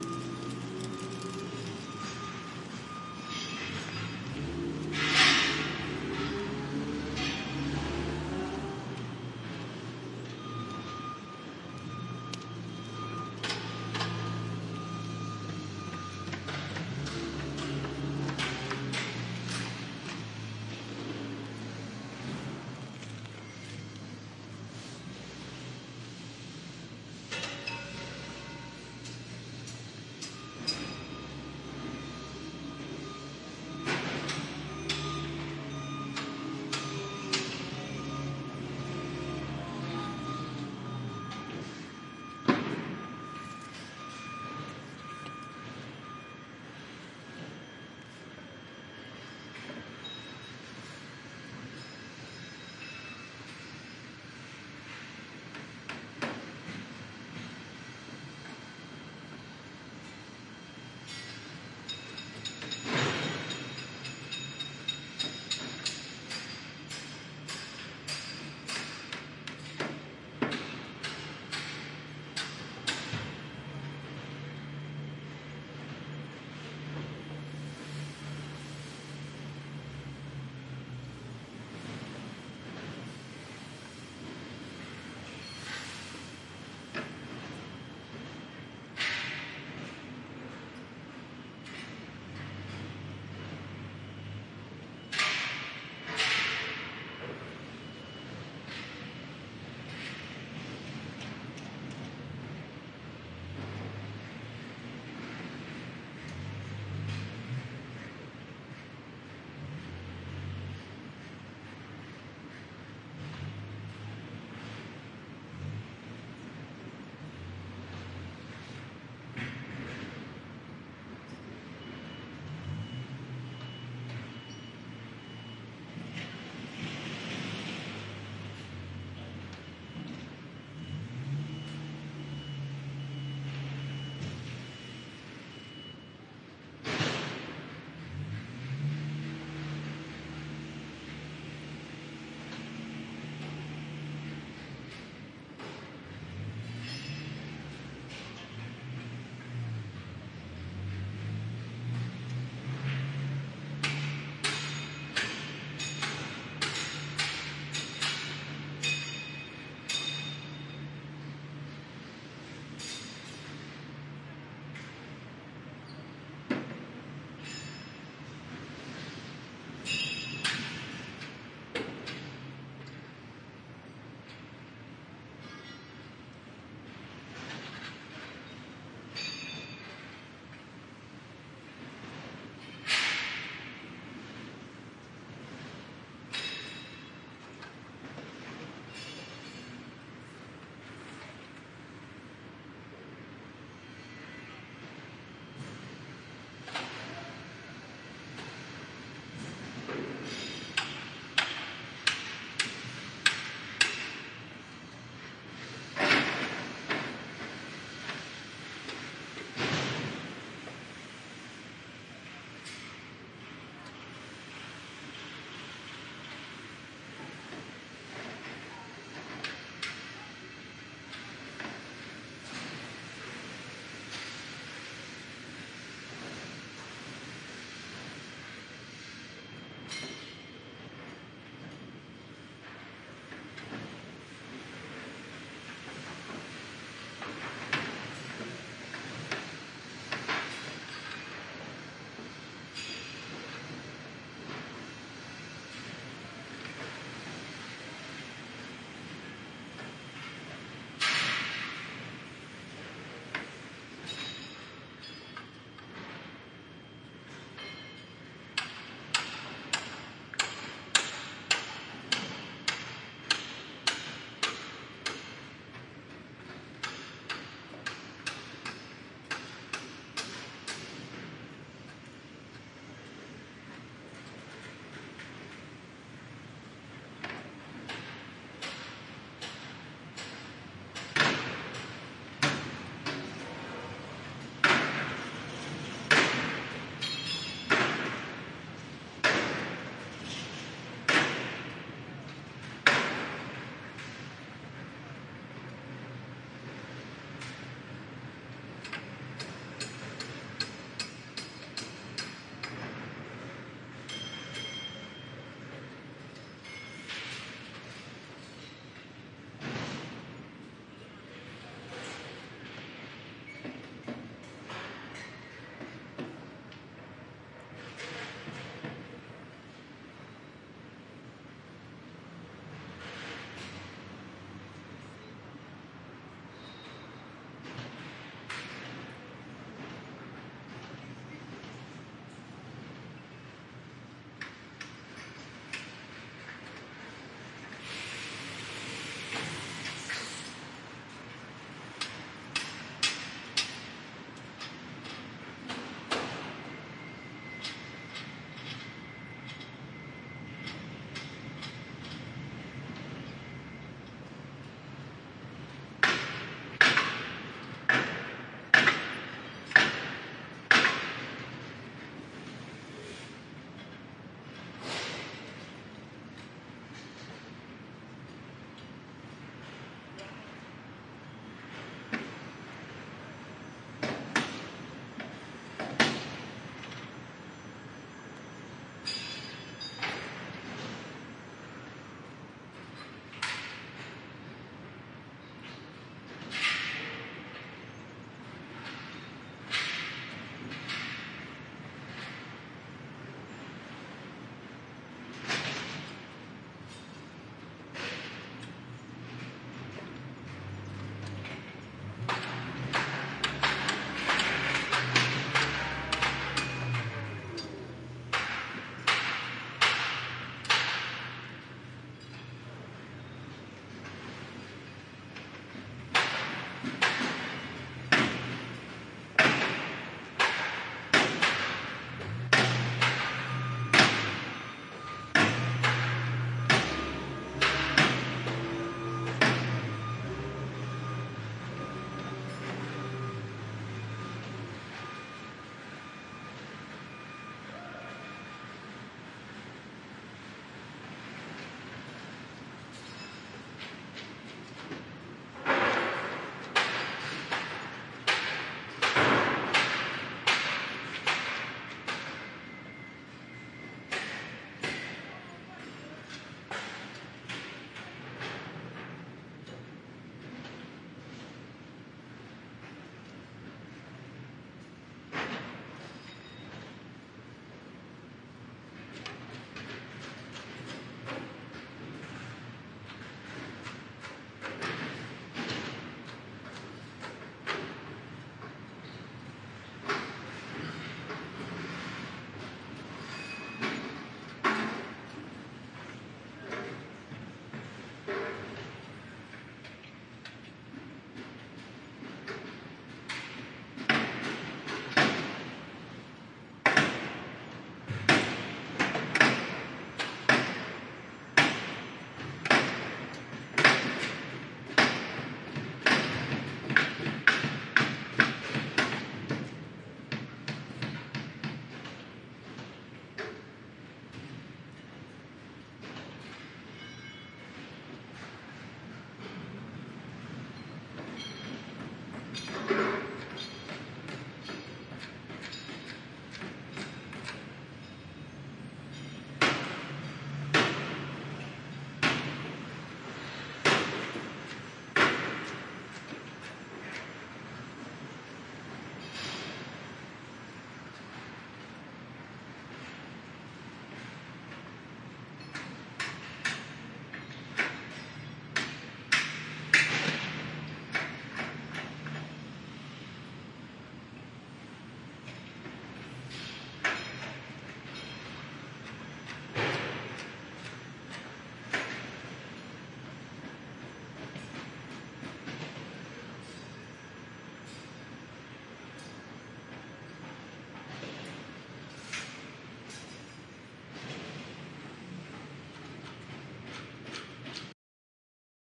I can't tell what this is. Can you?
General construction noises.
This recording was made using a Sound Devices MixPre6ii and a stereo pair of FEL EM172 mics. Low cut on the SD which in basic mode is 80Hz (I think).
There is some processing to this recording to ‘normalize’ the levels and light EQ-ing.